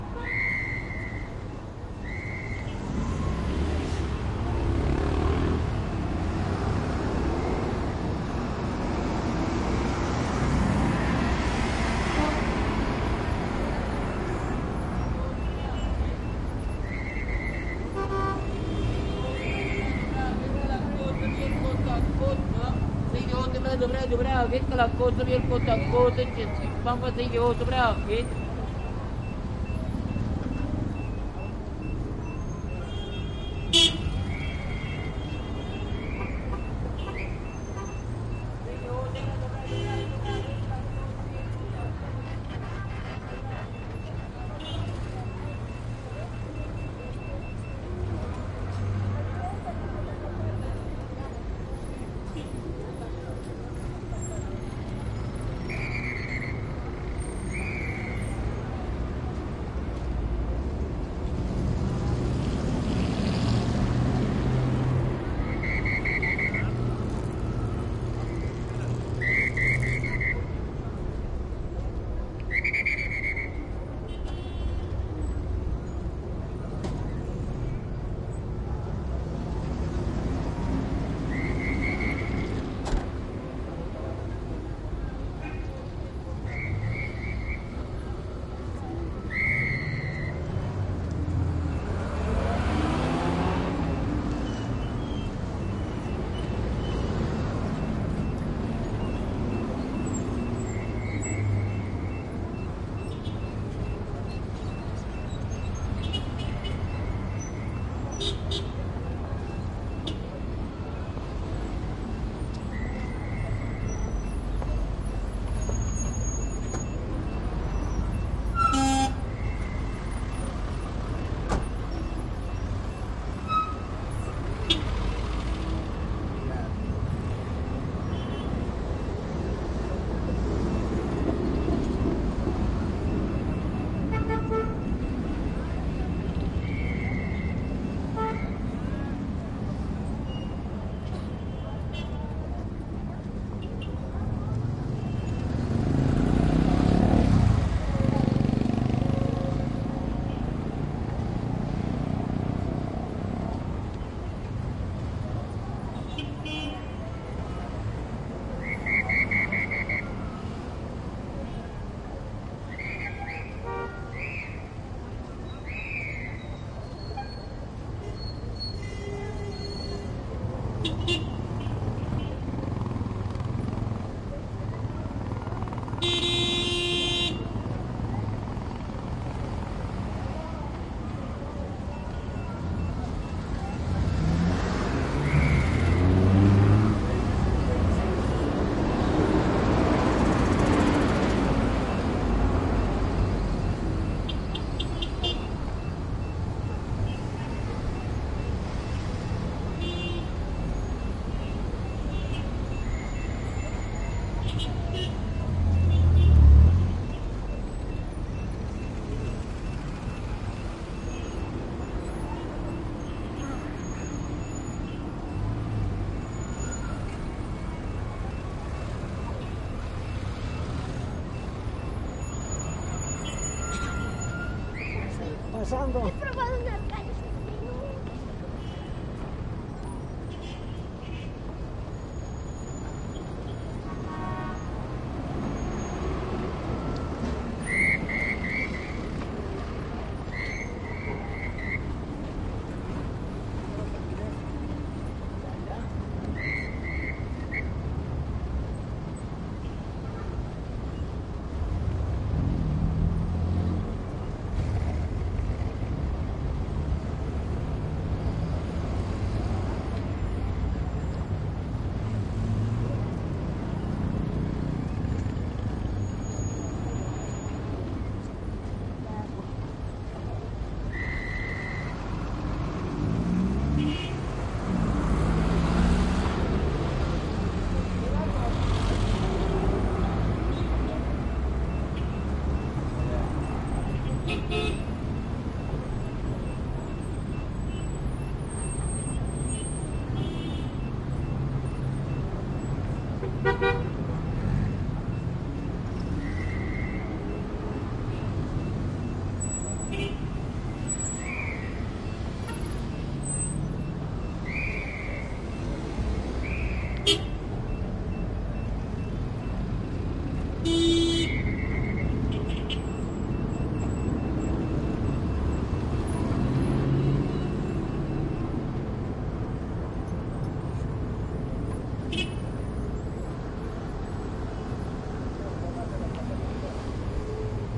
La Paz, El Prado, front to the “Centro de Faciltación Turística "Feliciano Cantuta"”. We can ear the “bip bip” for pedestrians. Recorded on 2017/07/24 at 11h13 with an Olympus LS100.